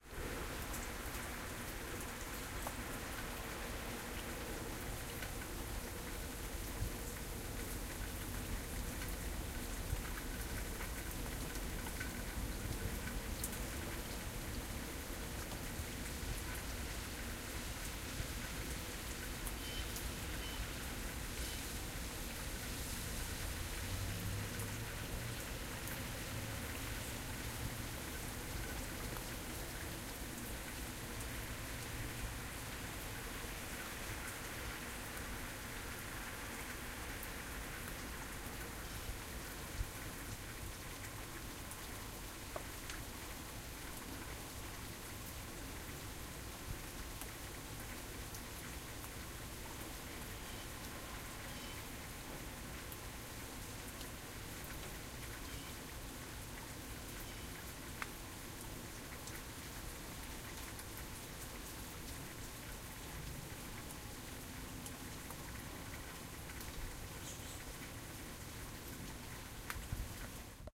Rain (frontdoor)
This is a recording of rain outside my front door. There are a few bird sounds in there as well.
weather, rain, birds, nature